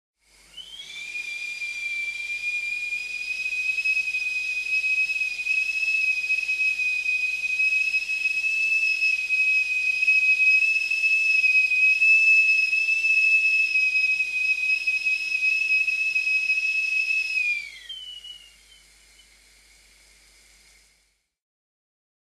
whistling kettle2
The Sound of a Kettle whistling as it comes to the boil on a gas hob.
Recorded on a Tascam DA-P1 Dat recorder and a Rode NT1000 microphone